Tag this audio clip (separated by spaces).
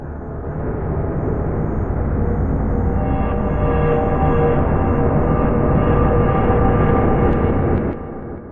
ghost mine